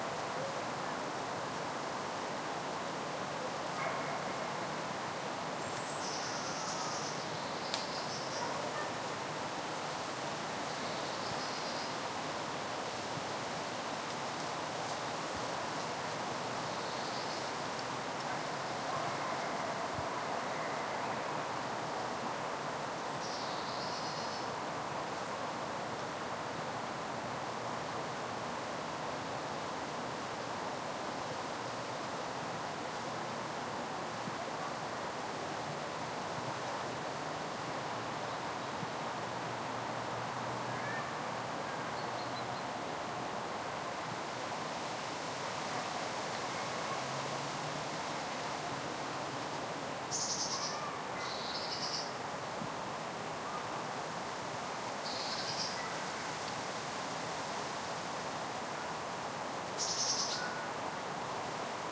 Autumn forest in Jyväskylä, Finland.